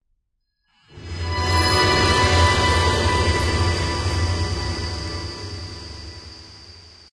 cinematic intro
cinematic, intro, intros, logo, logos, opening, trailer, videos